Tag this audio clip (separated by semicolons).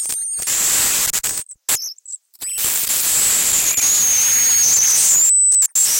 neural-network; glitch; digital; random; harsh; noise; lo-fi